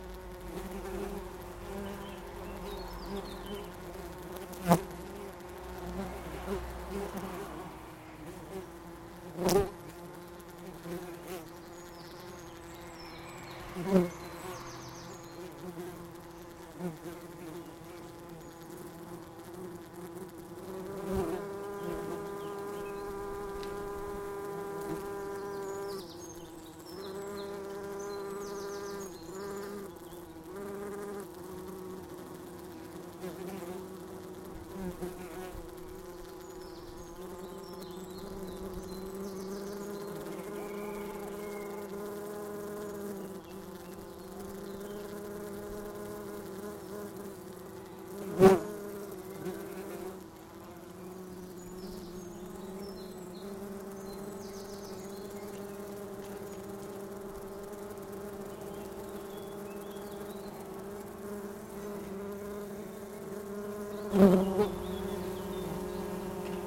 The bumblebees picked a bird house as their nest this year. One of them gives the mic a flick of it's wings. Recorded at close range with a DPA 4060 miniature microphone via SD 302 field mixer to SD 702 recorder. Normalized to -6dB.
buzz, bee, bees, outdoor, birds